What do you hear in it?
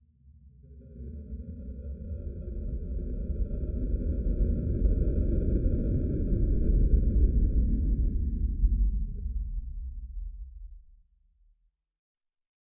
Something scary
Sound of a (sort of) monster growling. Is actually the recording of a passing car being slowed down a bit. Recorded with a phone and edited with audacity.
monster, sinister, bass, terror, anxious